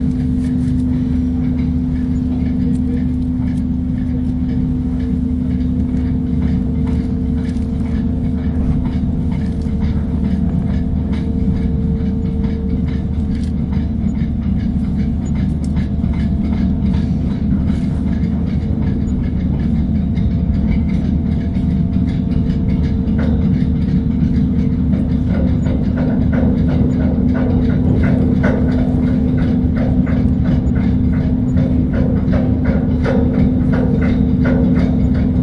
140912-King-Harry-ferry-setting-off
King Harry ferry setting off and gathering speed. The ferry is pulled along chains which can be heard clinking.
field-recording, river